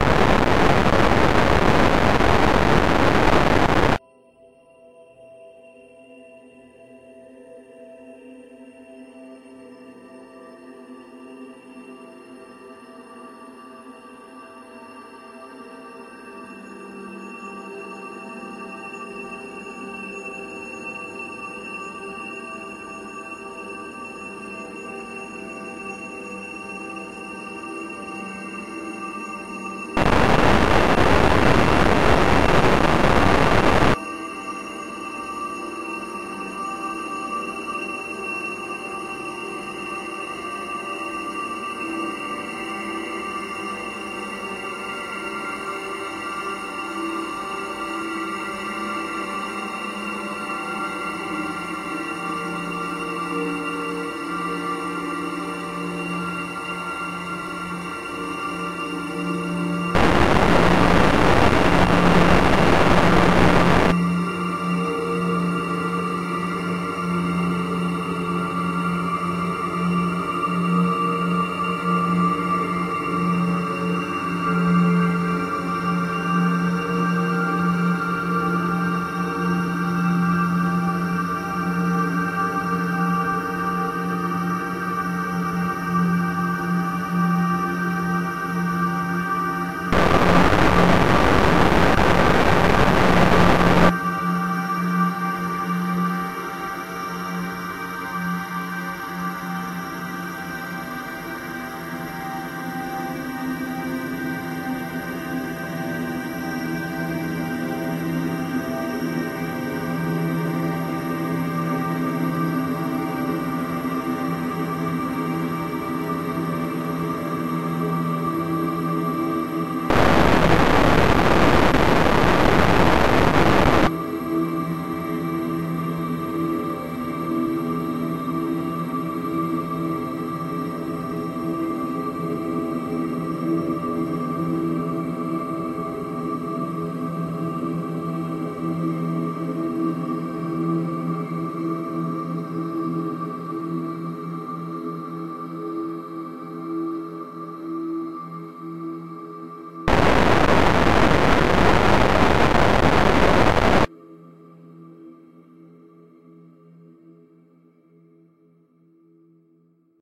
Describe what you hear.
CARBON BASED LIFEFORMS-73
LAYERS 012 - Carbon Based Lifeforms is an extensive multisample package containing 128 samples. The numbers are equivalent to chromatic key assignment covering a complete MIDI keyboard (128 keys). The sound of Carbon Based Lifeforms is quite experimental: a long (over 2 minutes) slowly evolving dreamy ambient drone pad with a lot of subtle movement and overtones suitable for lovely background atmospheres that can be played as a PAD sound in your favourite sampler. The experimental touch comes from heavily reverberated distortion at random times. It was created using NI Kontakt 4 in combination with Carbon (a Reaktor synth) within Cubase 5 and a lot of convolution (Voxengo's Pristine Space is my favourite) as well as some reverb from u-he: Uhbik-A.
ambient, artificial, drone, evolving, experimental, multisample, pad, soundscape, space